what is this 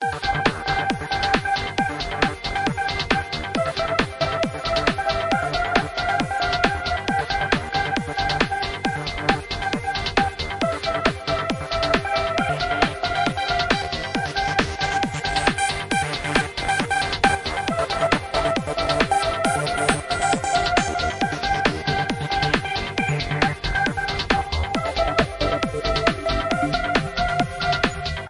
HARD DANCE TECHNO FINAL ONE BY KRIS KLAVENES LOOP
club, dance, hard-dance, rave, techno, trance, ultra